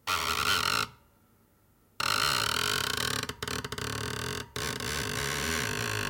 sounds produced rubbing with my finger over a polished surface, my remind of a variety of things